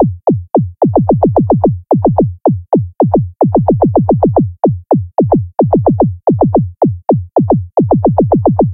Relatively high-pitched kick drums in a dense pattern.